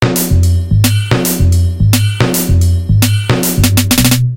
Rock Hard
A Rock Loop Recorded at 220Bpm.
drum, rock, bass